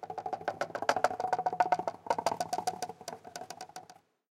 Recordings of different percussive sounds from abandoned small wave power plant. Tascam DR-100.
ambient, hit, drum, industrial, metal, percussion, fx, field-recording